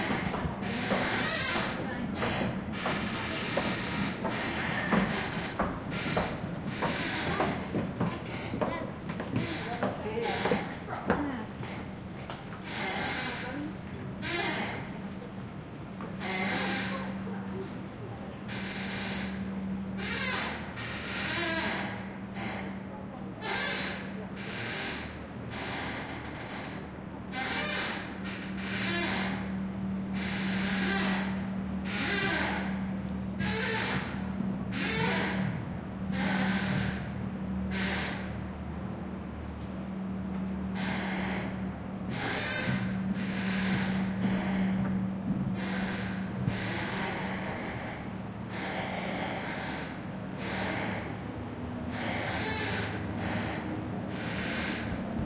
130216 - AMB INT - Pass to London Bridge City Pier
Recording made on 16th feb 2013, with Zoom H4n X/y 120º integrated mics.
Hi-pass filtered @ 80Hz. No more processing
Interior of the covered pass to london bridge city pier. the squeaky FX is triggered by the river waves...
pass, pier, river, squeak, steps, thames